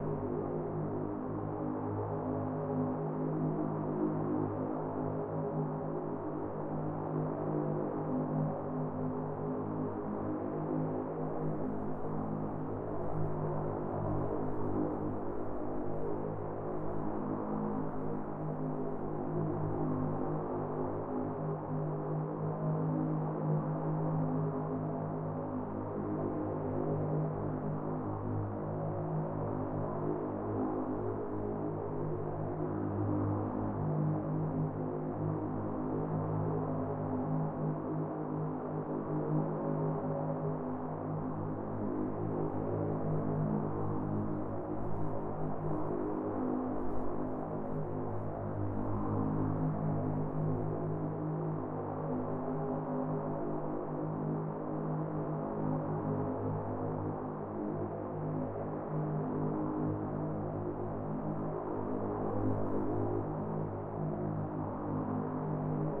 An ambient sound from the Sokobanned project.